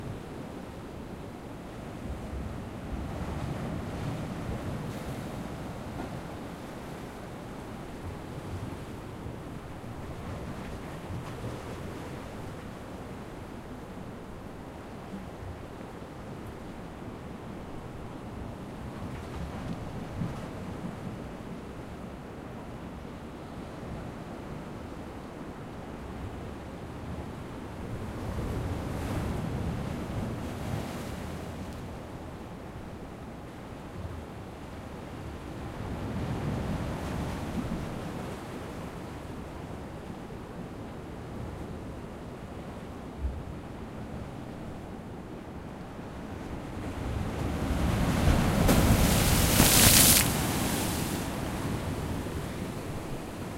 Mar escollera frente ola
Ola de mar golpeando de frente.
Sea wave frontal hit.
mar, ocean, sea